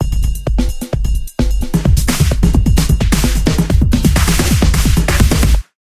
hip hop 8
sample sound loops
beat, dance, disko, Dj, hip, hop, lied, loop, rap, RB, sample, song, sound